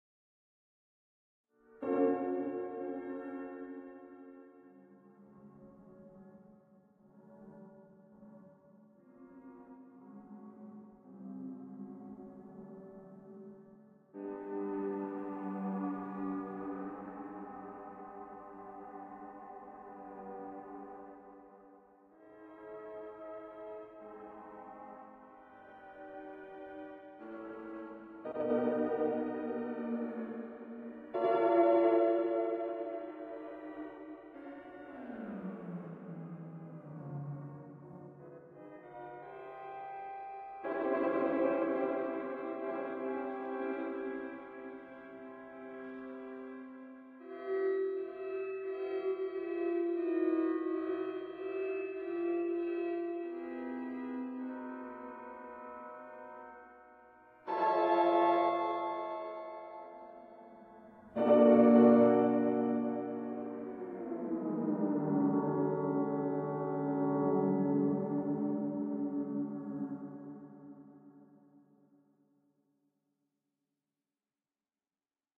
A wacom-tablet live improvisation of a spectral-analysis of piano chords